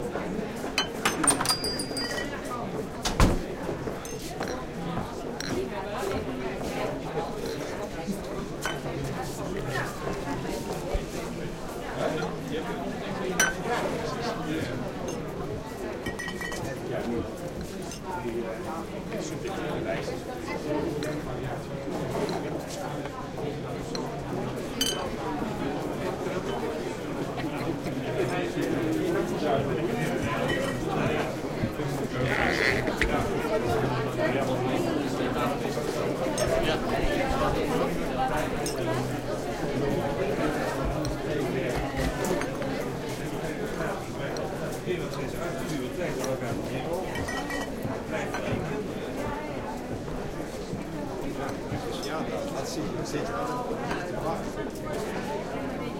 Crowded Bar - Ambient Loop
An ambient loop I created. Will loop seamlessly.
Ambience, Bar, Busy, Crowd, Drinks, Glasses, Large, Noisy, People, Restaurant